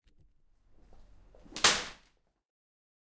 Sound of whip, recorded with ZOOM, no fx!